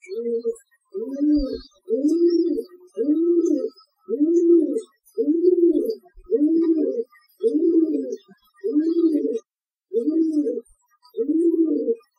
pigeon cooing (filtered) /arrullos de paloma, filtrados.
city, nature, cooing, birds, distortion, field-recording, pigeon